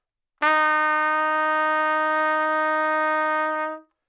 Trumpet - Dsharp4
Part of the Good-sounds dataset of monophonic instrumental sounds.
instrument::trumpet
note::Dsharp
octave::4
midi note::51
good-sounds-id::2832
Dsharp4, neumann-U87, single-note, trumpet, multisample, good-sounds